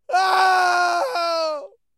Sad cry 5
Just so sad about something.
Recorded with Zoom H4n
acting, agony, anguish, clamor, cries, cry, distress, emotional, grief, heartache, heartbreak, howling, human, loud, male, pain, sadness, scream, screech, shout, sorrow, squall, squawk, ululate, vocal, voice, wailing, weep, yell